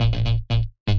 bass f e dd 120bpm-04
club, effect